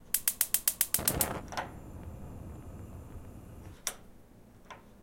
Electric stovetop ignition, model circa 2004. Quicker clicks and start than others I heard available here.